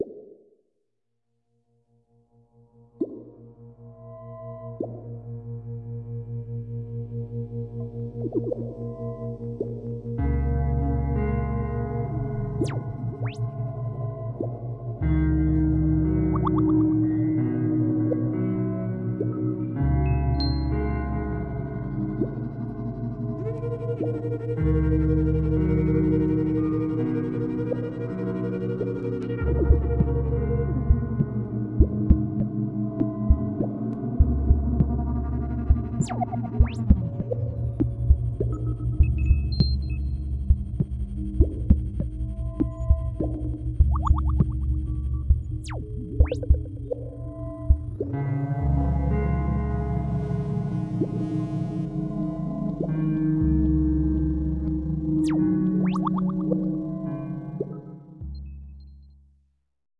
Original Soundtrack composed & produced by Sara Fontán & Aalbers recreating a musical soundscape for the neighborhood of Baró de Viver from Barcelona.